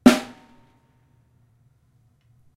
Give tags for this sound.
kit; drum; snare

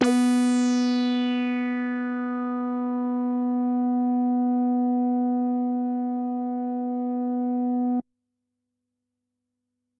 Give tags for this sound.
B3; synth

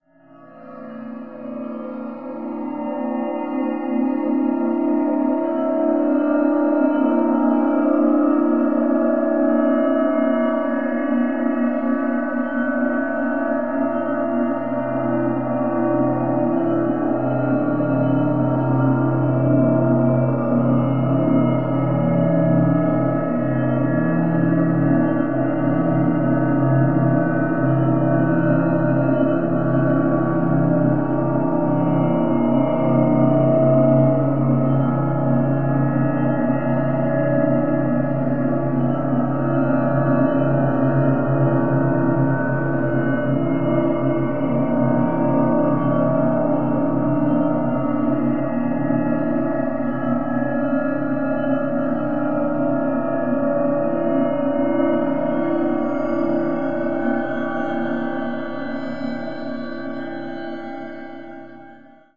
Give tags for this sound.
space; soundscape; metallic; evolving; drone; ambient; resonant

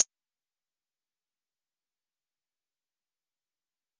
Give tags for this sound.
electronic; drum

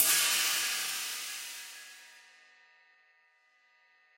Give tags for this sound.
1-shot; cymbal; hi-hat; multisample; velocity

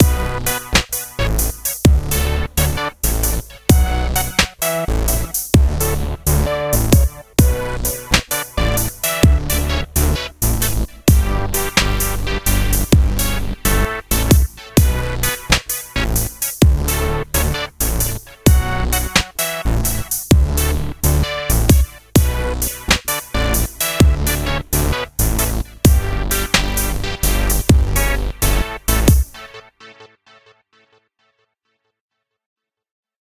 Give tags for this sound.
minor; loop; B; music; 65bpm

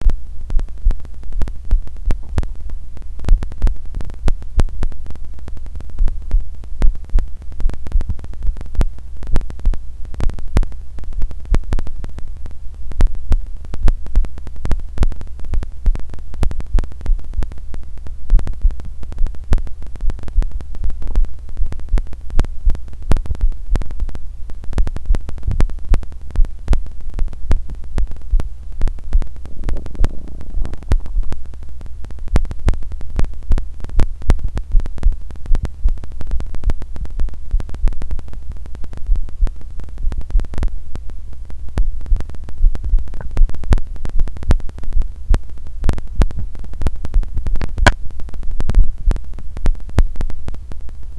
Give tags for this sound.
heart; heartbeat; hearthate; pulse; stethoscope

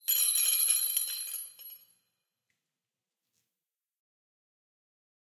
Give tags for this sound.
metal; theatre

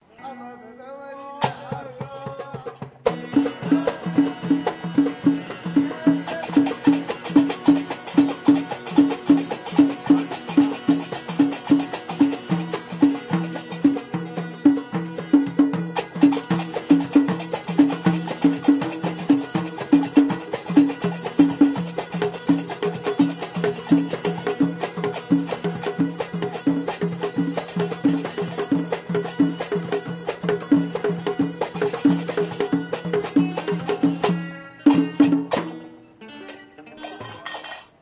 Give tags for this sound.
eastern; folk; folklore; improvisation; moroccan; music; performance; traditional

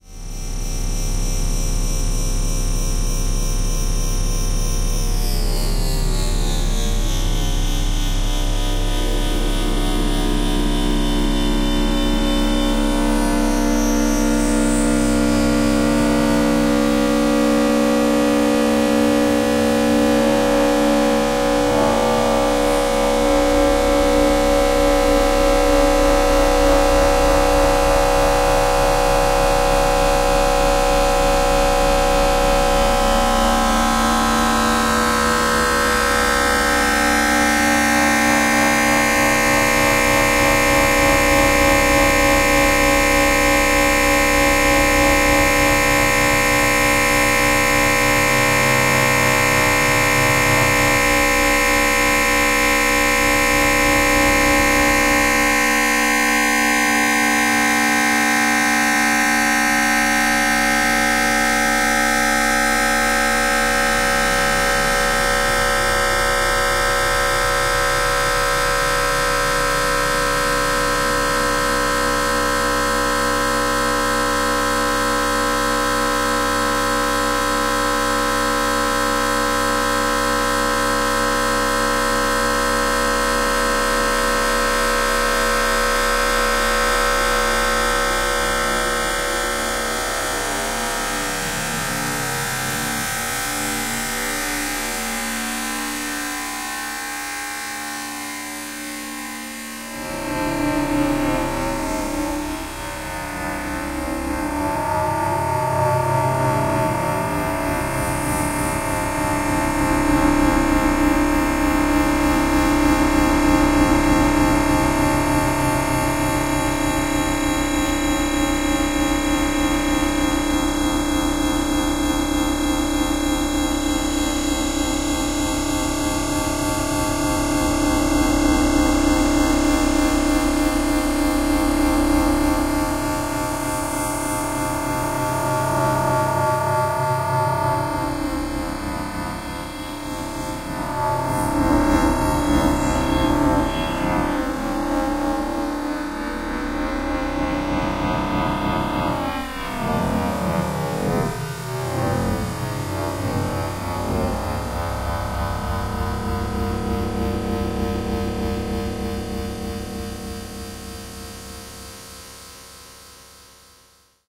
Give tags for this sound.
pulsating; sci-fi; space; ambient; future; spacey; effect; stretch; atonal; digital; atmosphere; ambience; deep; industrial; distorted; soundeffect; horror; sound-design; abstract; time; sound-effect; drone; pulsing; fx; dark; ambiance; sound; sfx; synth; nightmare

